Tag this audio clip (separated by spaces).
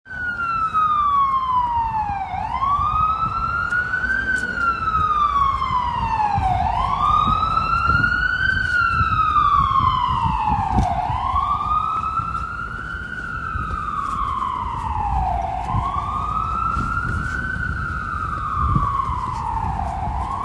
ambulance lit loud